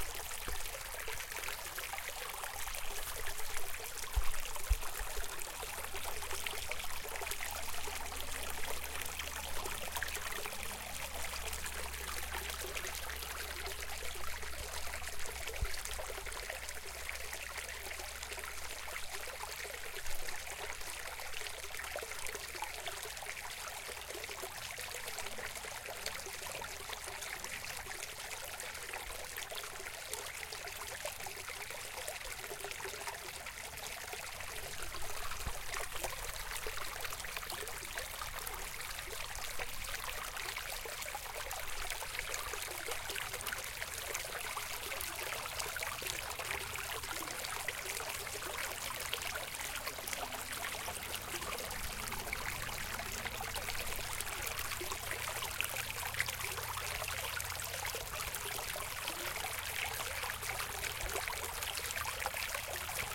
Recording of a small stream in Rock Creek Park in DC.